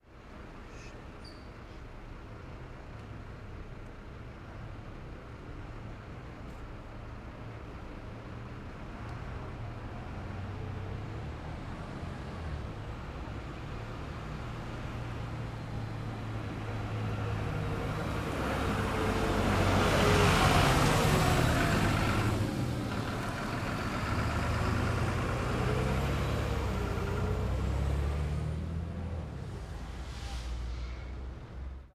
Ambience Rome Bus Station 003
Ambience, Bus